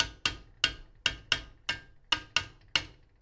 griddlepan-edit
striking the side of a griddle-pan while cooking. Zoom H1.
pan, cooking, kitchen, cook, pot, frying, stove, domestic